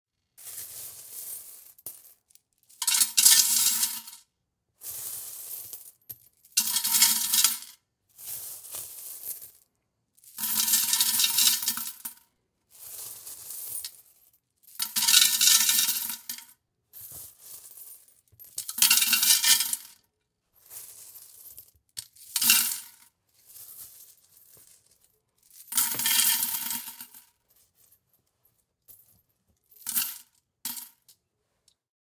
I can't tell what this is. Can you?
Recorded with AT875R inside the jar.
Coins; Coins being dropping in glass jar, coins, glass, metal, hollow, dropping, change, money